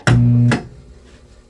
Electric Swtich - Short
Bathroom Light switch
bathroom, light